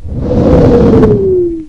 Incoming mortar 2
Sound of an incoming mortar round
incoming, artillery, mortar, whistle, grenade